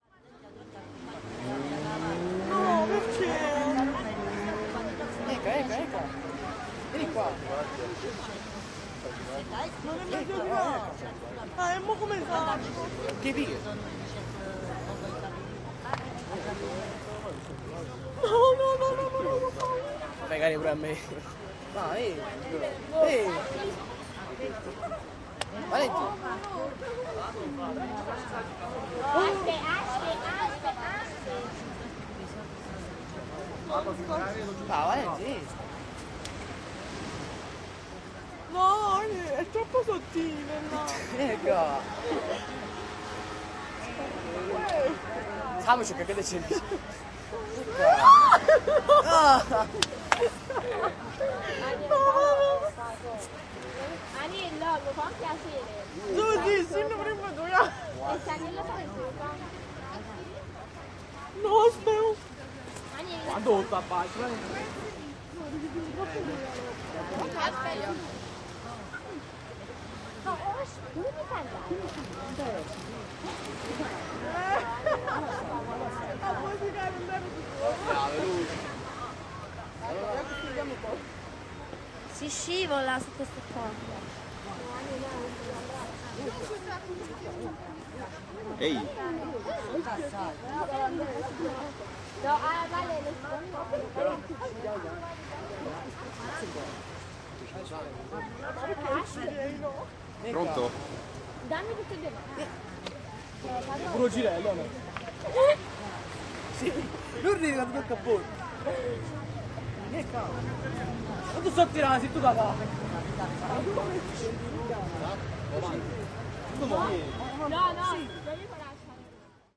windshield
Urban seaside in Naples
Recorded about m5 from the sea.
small waves, traffic and girly voices in background.